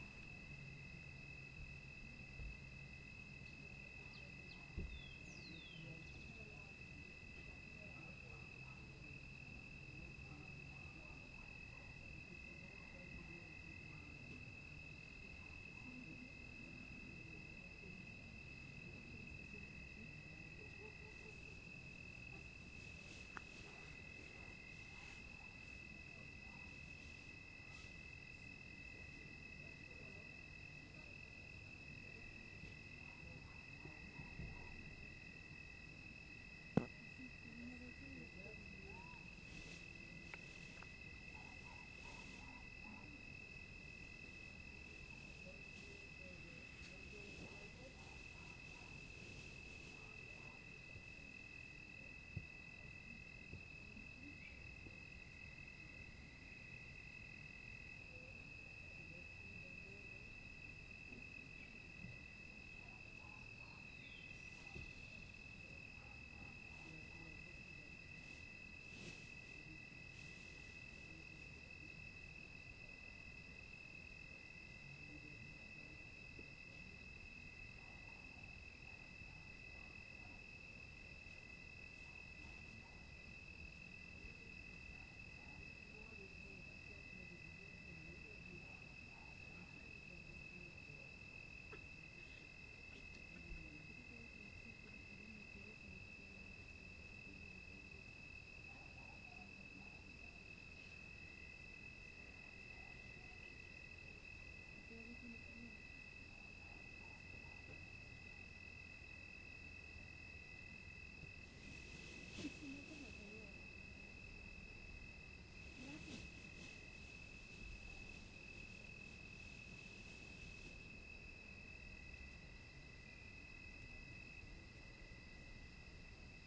crickets night south america and distant interior voices